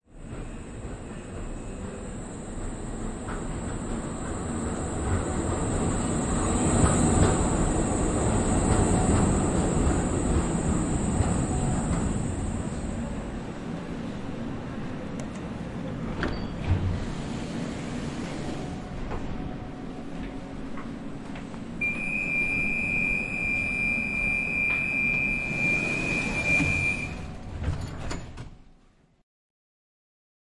Person is getting out of the tram